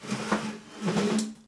chaise glisse12
dragging a wood chair on a tiled kitchen floor
furniture, floor, squeaky, dragging, tiled, chair